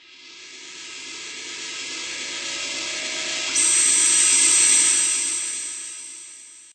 spacey sounding build up from my yamaha